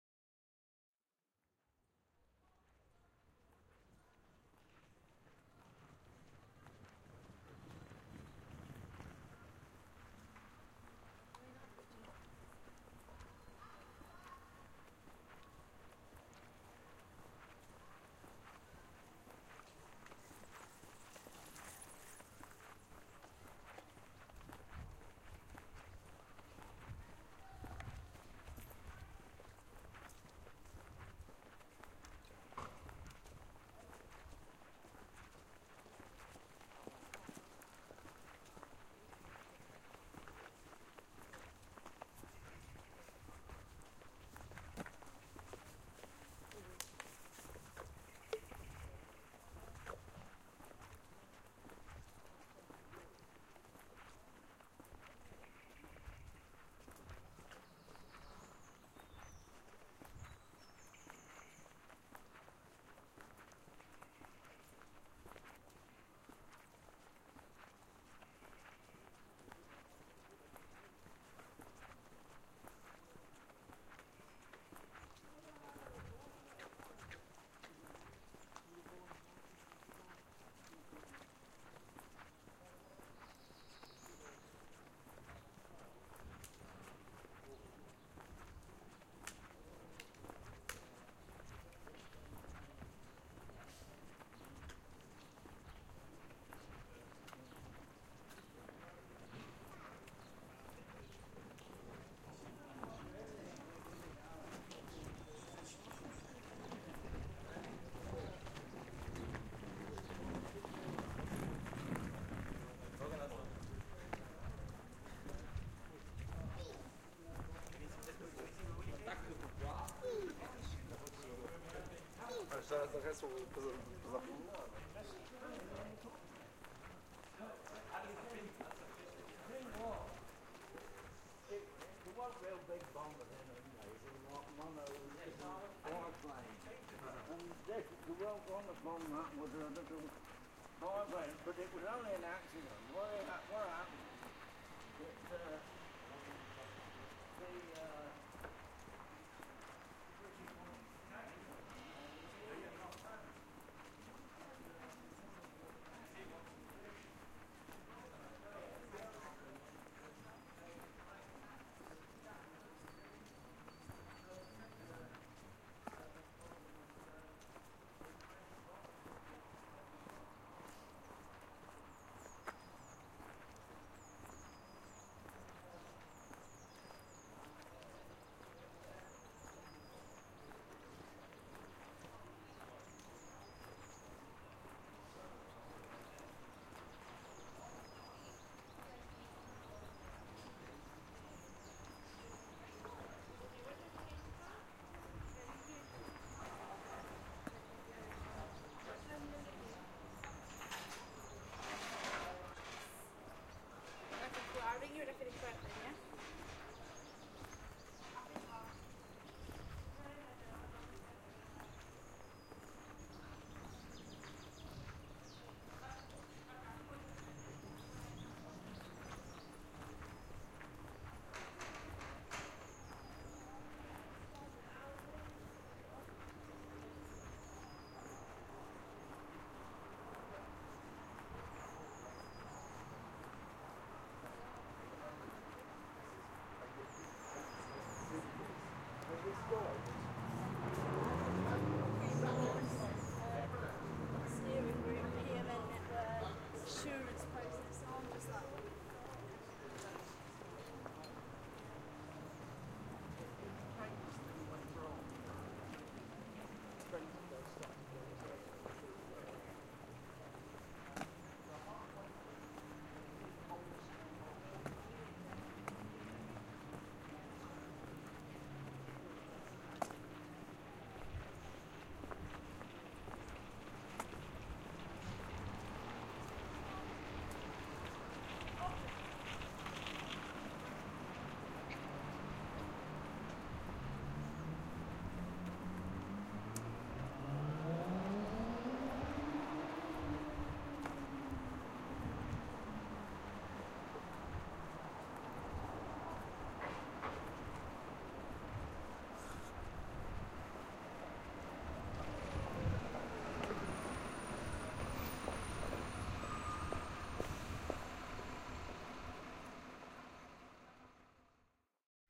Soundwalk at New Walk, Leicester 15.05.11
A soundwalk recording of the journey from New Walk Museum to King Street on a beautifully sunny day in Spring.